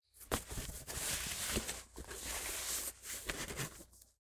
25 Cardboard Scooting
cardboard, paper, box, foley, moving, scooting, handling,
box, cardboard, scooting, foley, paper, handling, moving